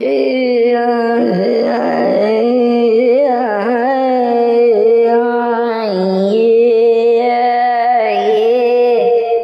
A kinda native or alien sounding chant.
ancient, chant, native, alien, offworld